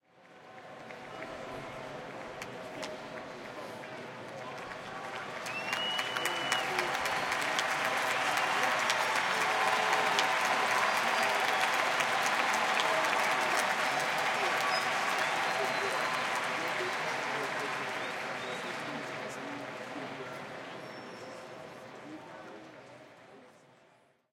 WALLA Ballpark Applause Short 01
This was recorded at the Rangers Ballpark in Arlington on the ZOOM H2.